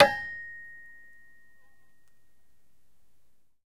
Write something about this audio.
Hitting a metal gate with a wooden rod.